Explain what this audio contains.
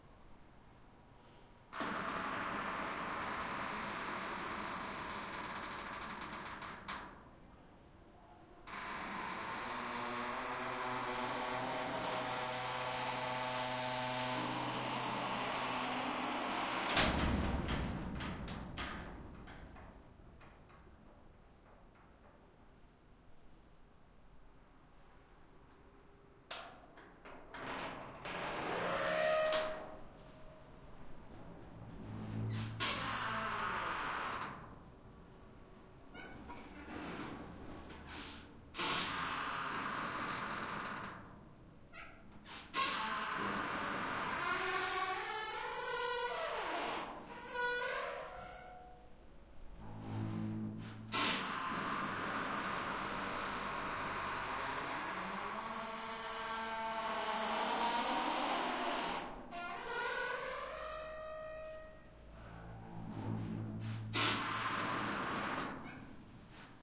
this is recording of closing and opening metal door between two metal container, beetween opening and closing there is one little bass moment that I heard from one sf movie